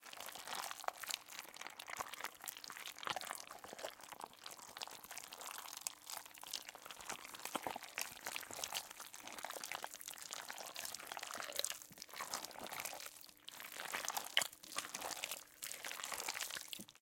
Alien is crawling-003
The sound of meat tenderloin being stirred
flesh, slips, alien, gurgle, horror, slippery, slimy, slime, meat, rustle, liquid, predator, creep, viscous, vile, worm, crawl